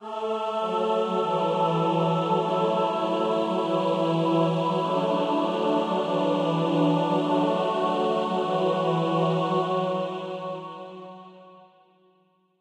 ch4 100bpm
These sounds are made with vst instruments by Hörspiel-Werkstatt Bad Hersfeld
trailer, background-sound, deep, suspense, film, epic, ambient, hollywood, dark, space, soundscape, ambience, thriller, spooky, atmosphere, mood, pad, thrill, music, choir, dramatic, drone, drama, background, cinematic, scary, horror, sci-fi, chor, movie